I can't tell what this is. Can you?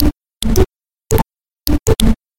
A soft pillow HIT LOOP!